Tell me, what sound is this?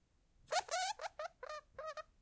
11-01 Degu Squealing Strong
CZ, Pansk, Czech, Panska